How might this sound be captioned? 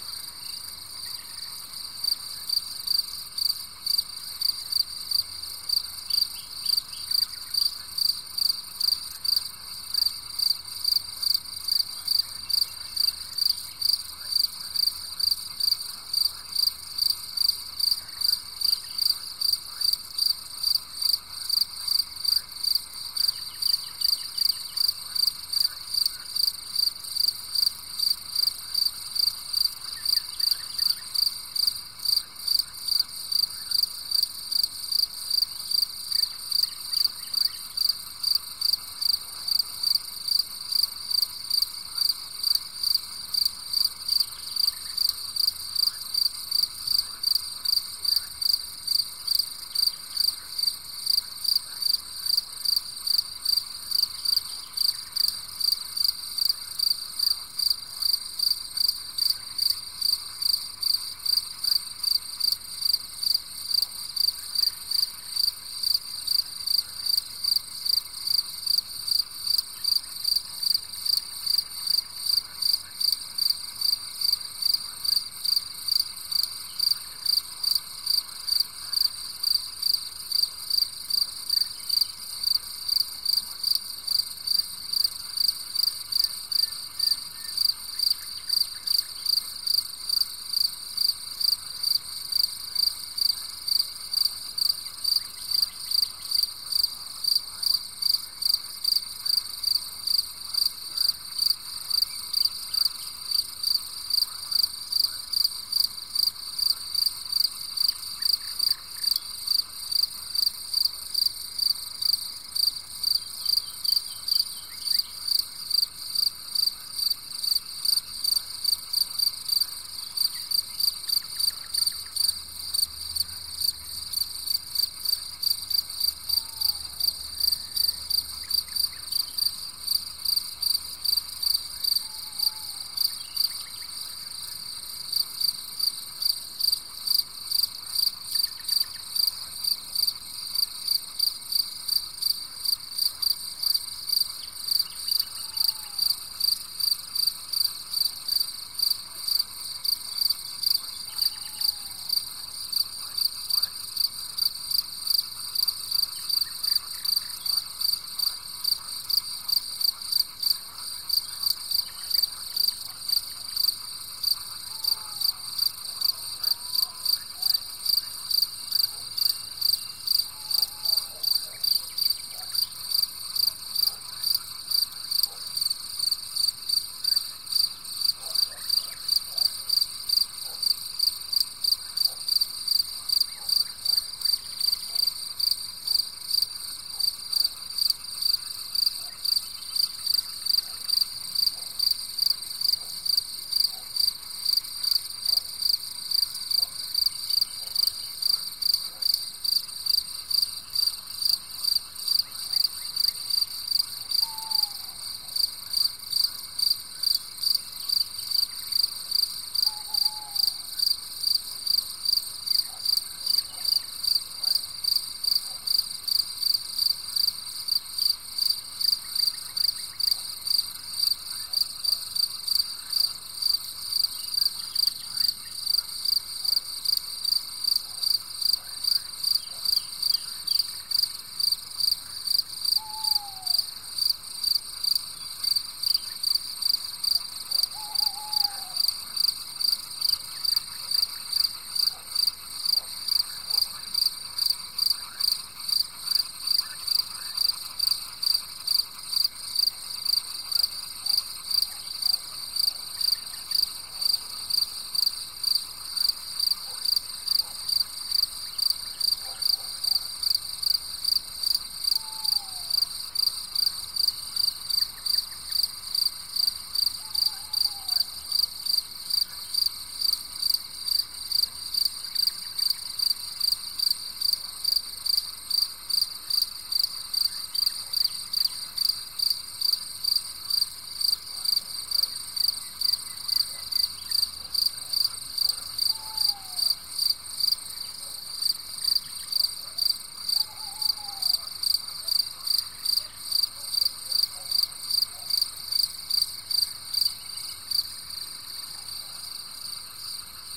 COUNTRY NIGHT CRICKETS
At a friend’s garden at night (23h12). Les Ravières (center of France) , 2018/5/26. Recorded with a Rode NT4, Sound Devices 302 and Olympus LS 100, flat, countryside mood with a lots of crickets (le « grillon bourbonnais »), frogs at the background and sometime an owl.
ambience, field-recording